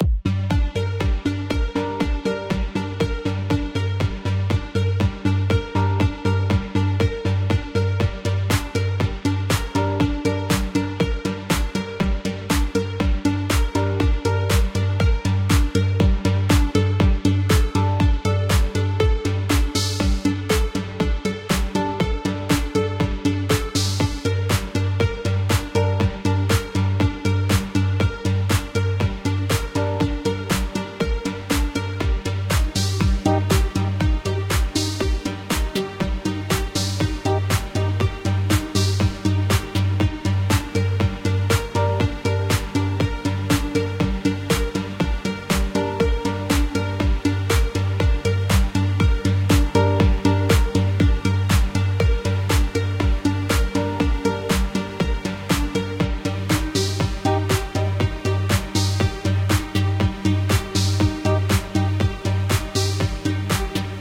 "sheltered " electronic loop.
Synths;Ableton live,Massive,Silenth1-
bass, beat, club, dance, drum, electronic, house, kick, loop, original, pad, sounds, soundtrack, synth, techno, track, trance